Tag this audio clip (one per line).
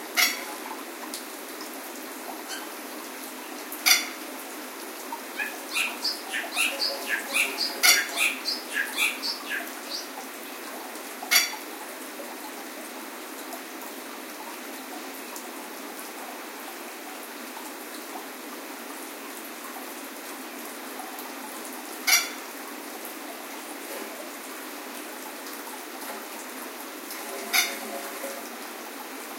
aviary
bird
birds
exotic
field-recording
lapwing
plover
starling
stream
tropical
water
zoo